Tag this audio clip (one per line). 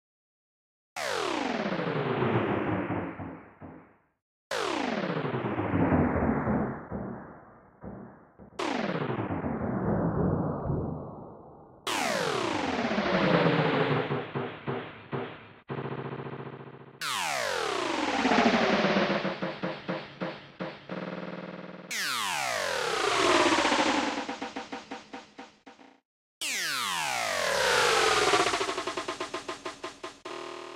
losing
wrong
fail
error
mistake
downward
chop
glitch
shift
pitch
scratch
gameover
game-over
freaky